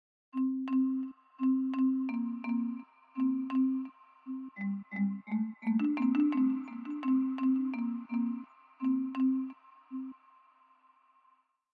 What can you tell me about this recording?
Blopady Bloo
A marimba with multiple effects applied
170bpm, Blopady-Bloo, Marimba, Warped